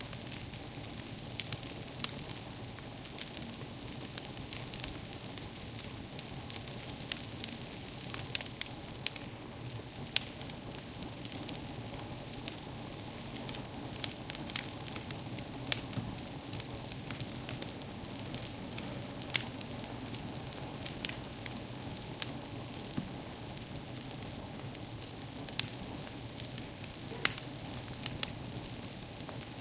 fire background
Soul Digger 🎼🎶
burning, field-recording, fire, home, purist